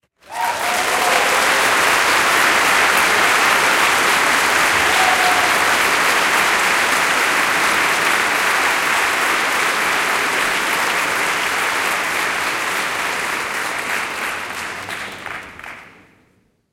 enthusiastic applause
This was recordet during a philhamonie concert in Essen.
orchestra, crowd, happy, acclaim, clapping, applause, cheers